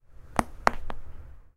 Back Crack 2
A recording of a self-administered chiropractic adjustment of the lower vertebrae. Recorded with a condenser microphone.
spine, foley, back, crack, chiropractics, body, pop